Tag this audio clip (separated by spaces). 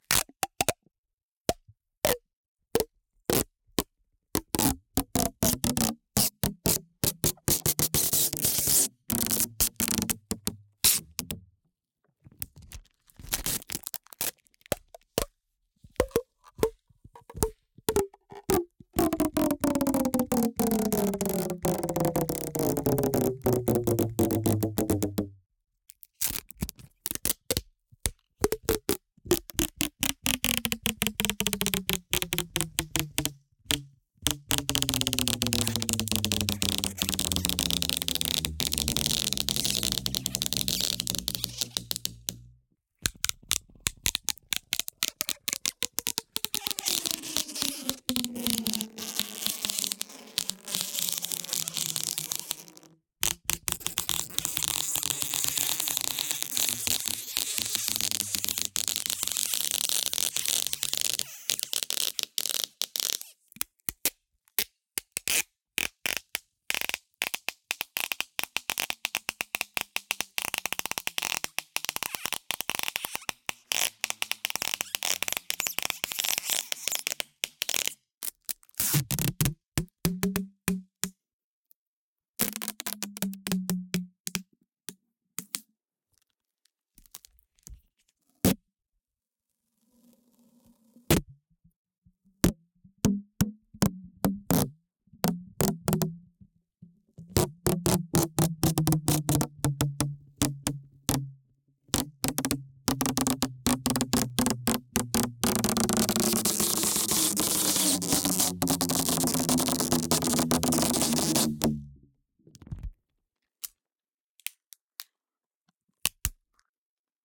design duct effect foley prop props pull pulling pulls sfx short sound sounddesign tape tension use using